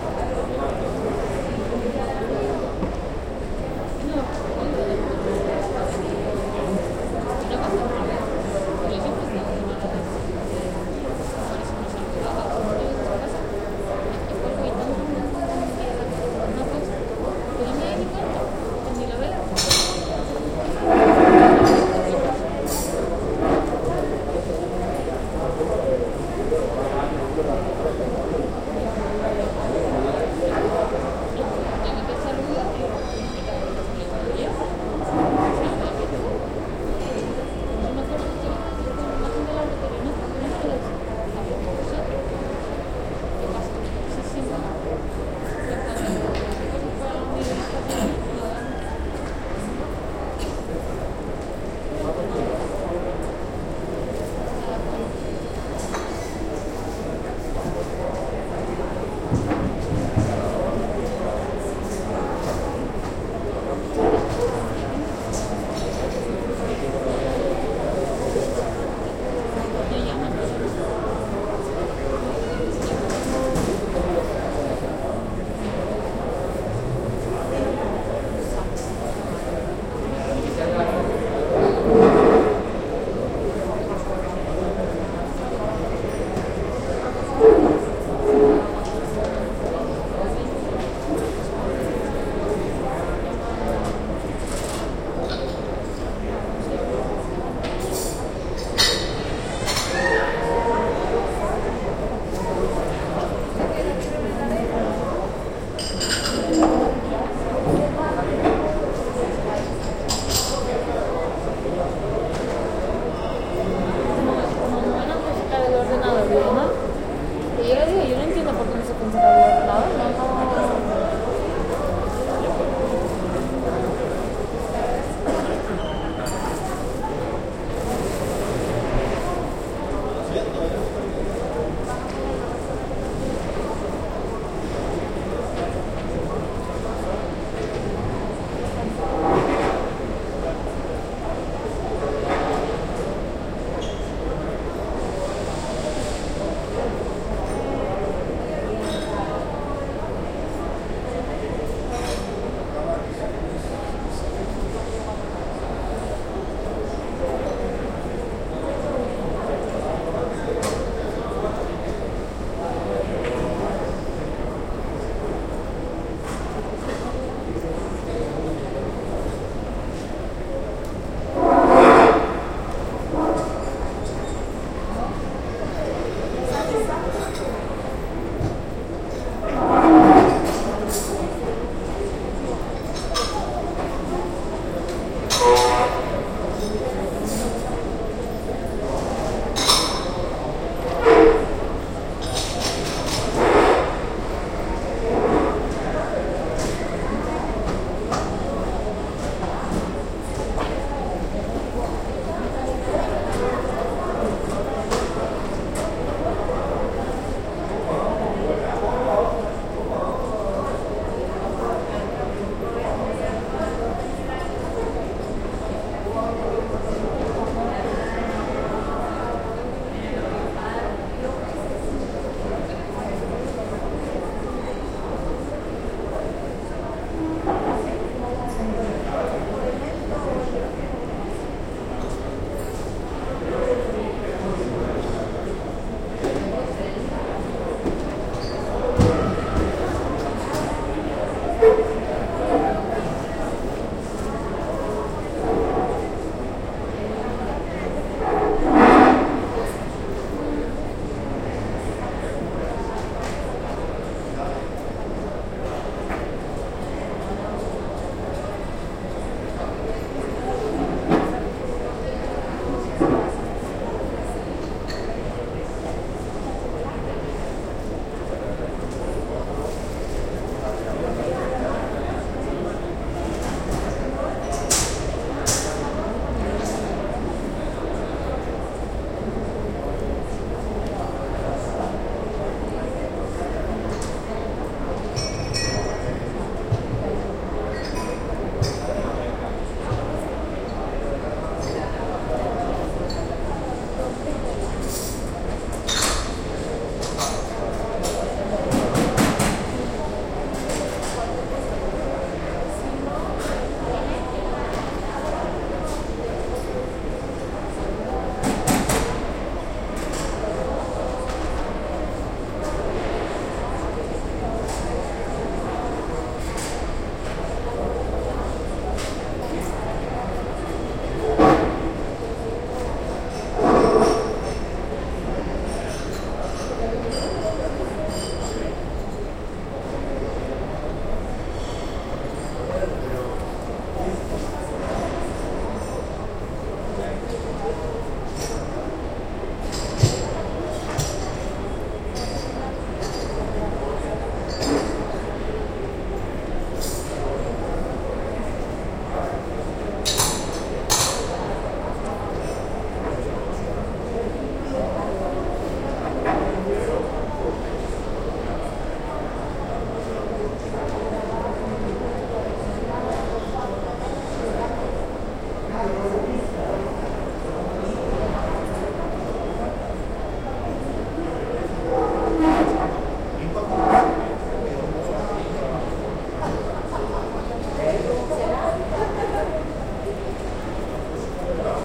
Airport cafeteria ambience recorded with the Marantz PMD 661 MKII internal stereo mics.